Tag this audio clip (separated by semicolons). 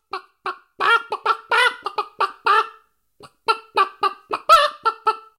animal; hen; cackle; chicken; clucking; cluck; chickens